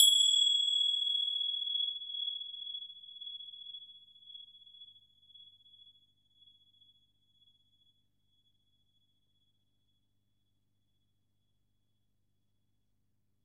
Tibetan bell bought from Horniman Museum, London and recorded in my garage, Forest Hill, London or was it the loft? ice resonance